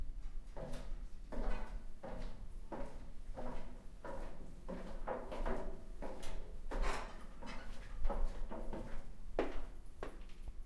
Walking down a spiral staircase into the office basement at work.